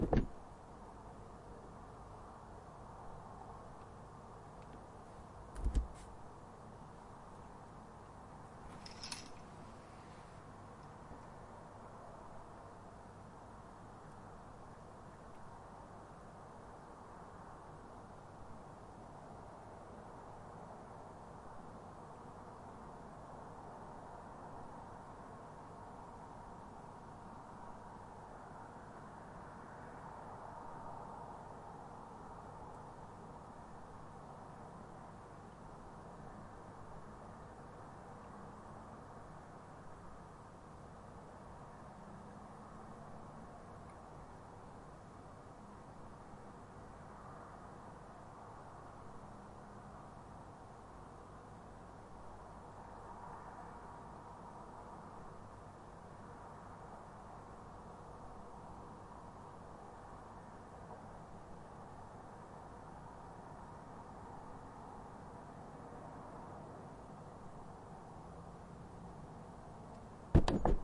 Air Tone London City Night
London late night air tone recording of London, roar of traffic can be heard in distance.